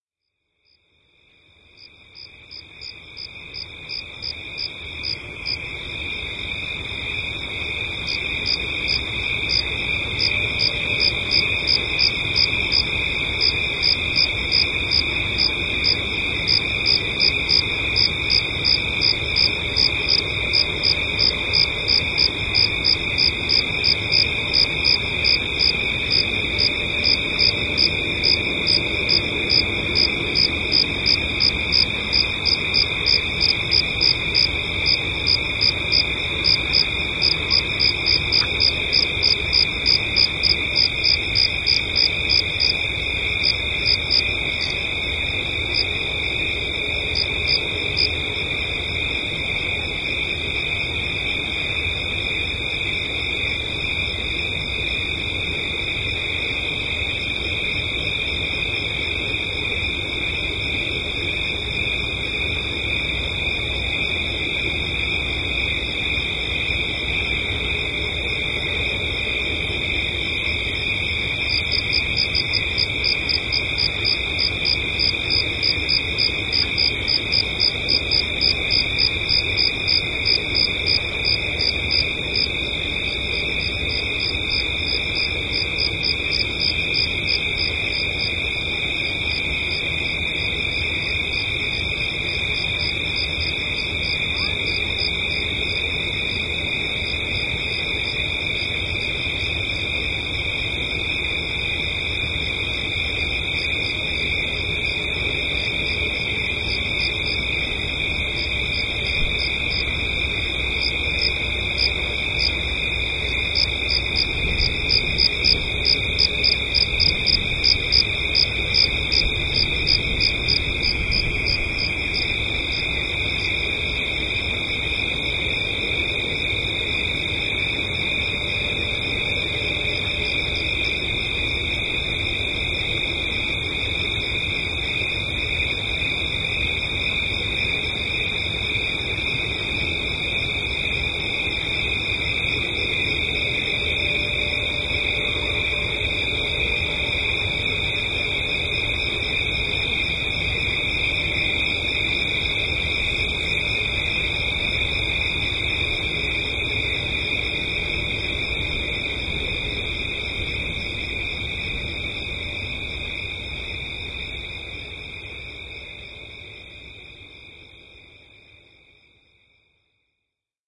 sherman 29aug2009tr11
sherman-island, crickets